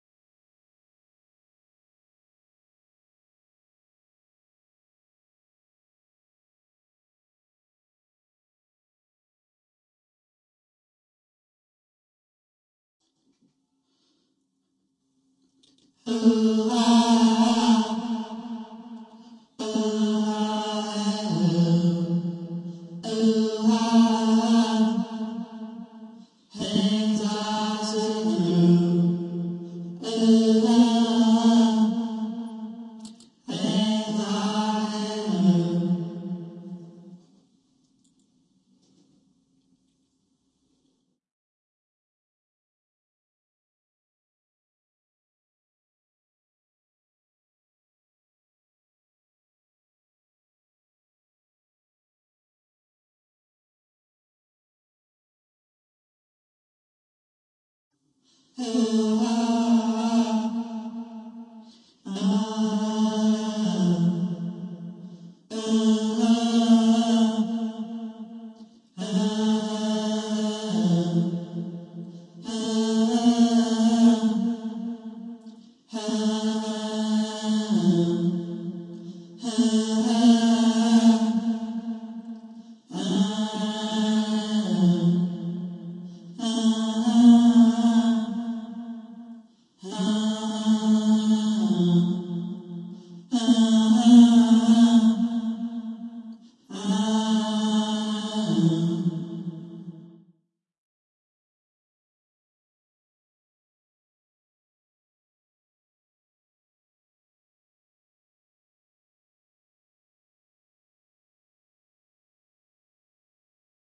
things-Vcoals
Vcoals used MXL 6036 => ART Studio v3 => computer
Edited with Reaper (DAW)
I'm the vocalist.
chorus, reverb, vocal